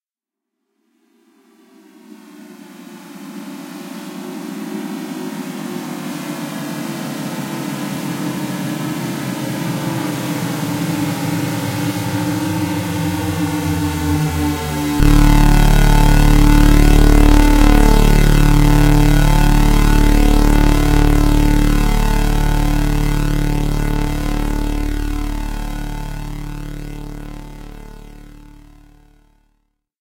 Thorax-1138 is a bass-heavy sweep and chord inspired by THX's "Deep Note". It makes a fine subwoofer audio test, but probably will sound lousy in headphones.
Other homages to "Deep Note" have been made, but none I've heard have yet had sufficient bass.
To be precise, the "deep note" being hit here is D1 (36.7 Hz). I considered going lower, but some of the material I read about "Deep Note" stated that its fundamental was actually an octave higher, at 74Hz.
Deep notes aren't all this sound has, though. That's due to two factors: I made it out of square waves only, plus I added chorusing on the final chord.
I created this using a command-line UNIX tool called "SoX". This was done in a single invocation of SoX and could actually be written as a one line script. However, to make this easier for other people to edit, I've split the parts out (see script below). Note that the sound will be slightly different each time it is run as it uses a random number generator.